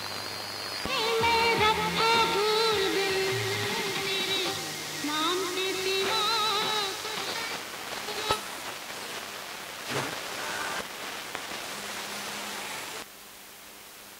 A frequency sweep through shortwave. A radio stqtion comes into tune but then is quickly lost.
Recorded 1 Oct 2011.
interference, radio, mono, short-wave, frequency-sweep, lo-fi, static, lofi, shortwave, am